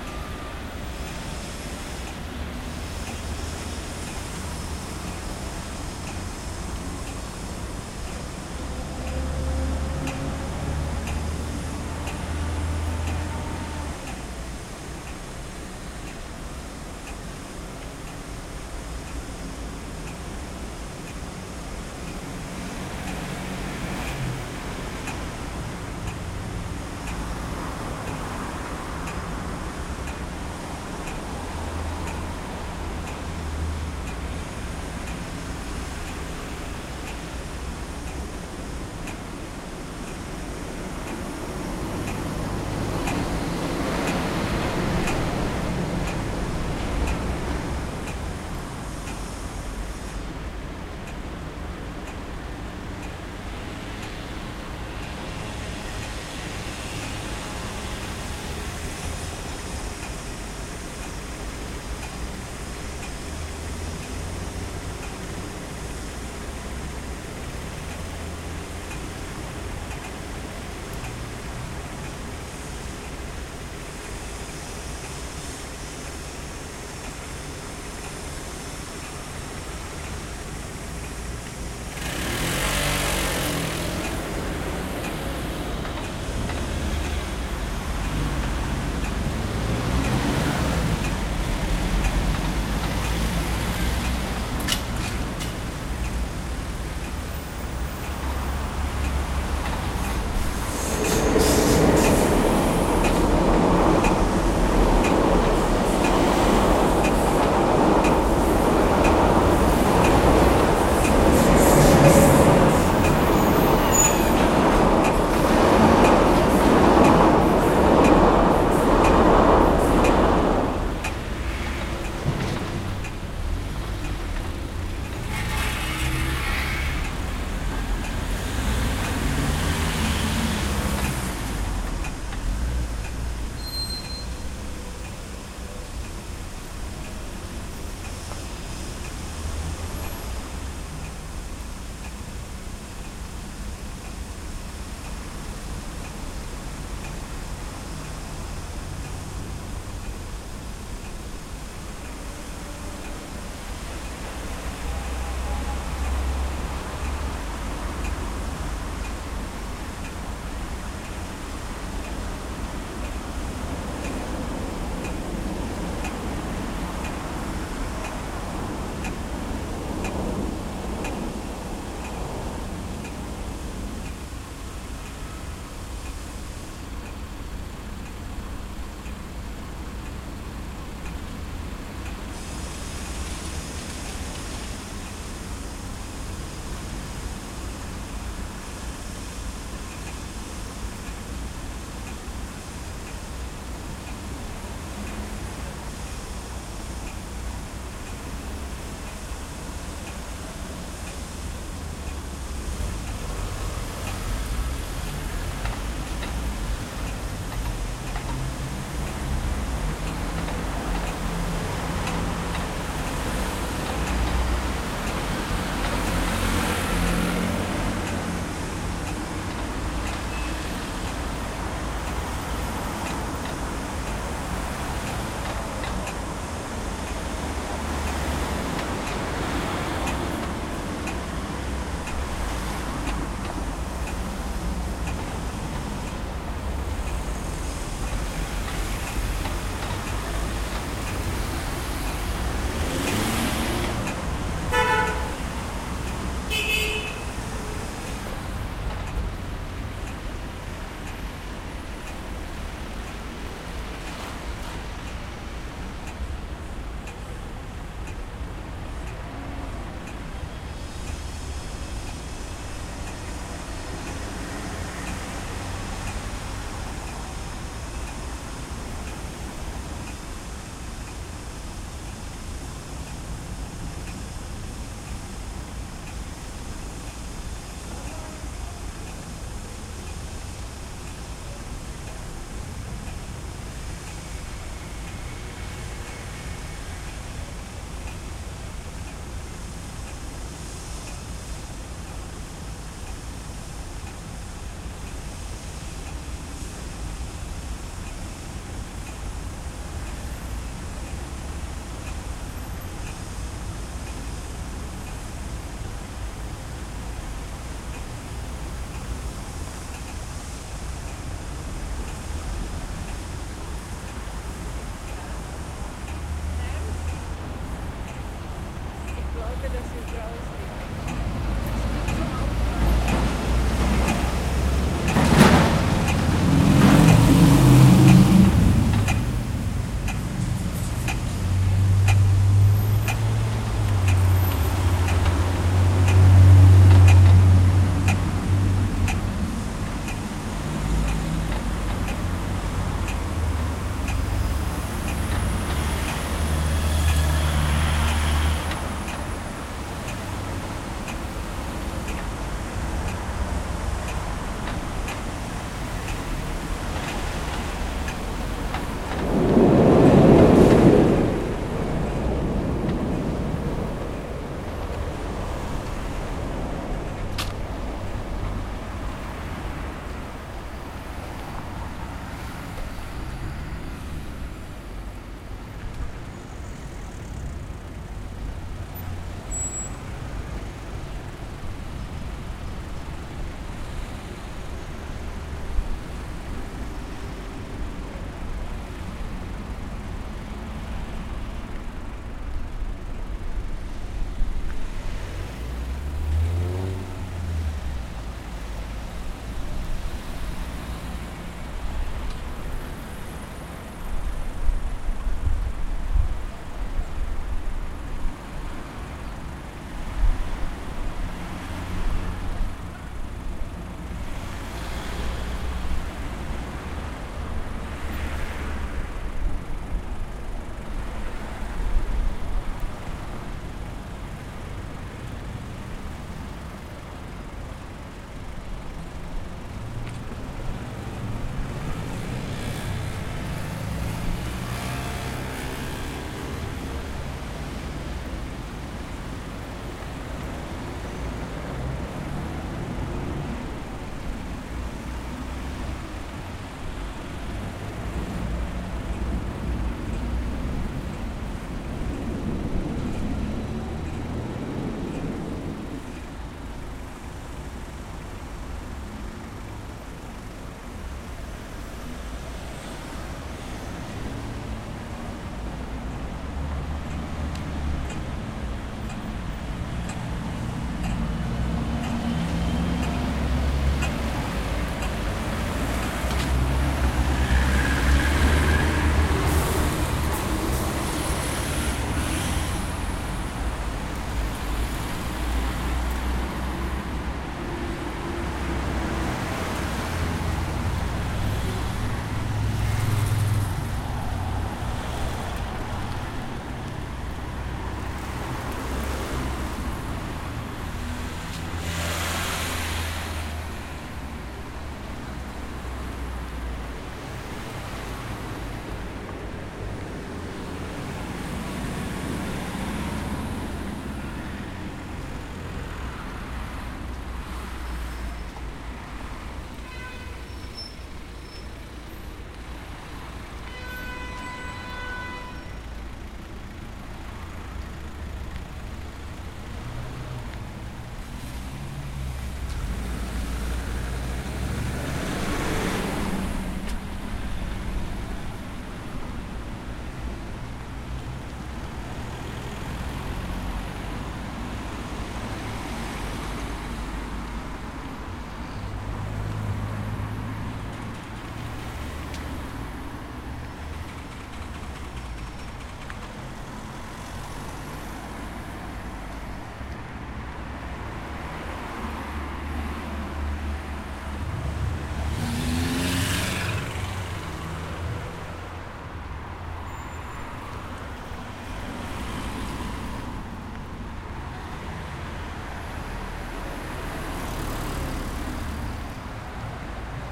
streetnoises, recorded in June 2011 at the crossing of Felberstraße / Linzerstraße / Johnstraße
noise, street, traffic